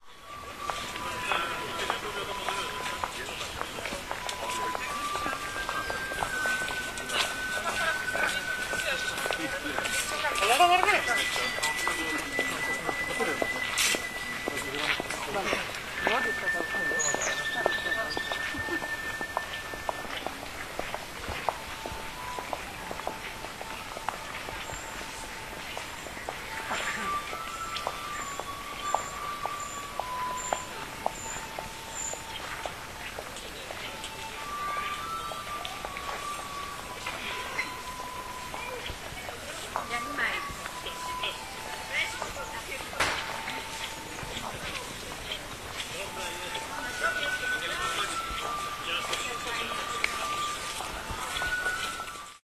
cemetery ambience 011110
01.11.2010: about 15.00. the All Saints' Day: Milostowo Cemetery in Poznan/Poland. the general ambience of cemetery: birds, people passing by (steps, voices) and some musical sounds in the background (the flute sound).
ambience, birds, field-recording, music, people, poland, poznan, steps